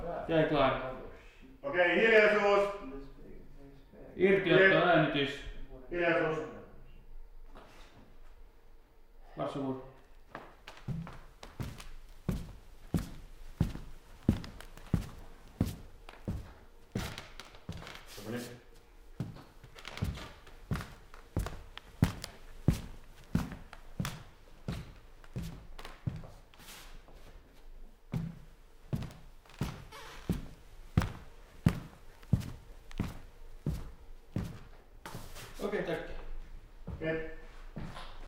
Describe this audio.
BLODIGT AT04 1